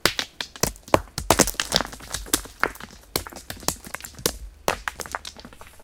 Pull a stone and throw it at some other stones